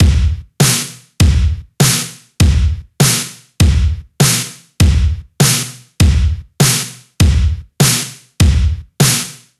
Drums kick Snare
Drums, Snare